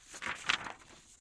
Page turn/flip